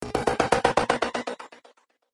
cabo del 02
efeitos produzidos atraves de um cabo p10 e processamentos!!
cable, FX, cabo, delay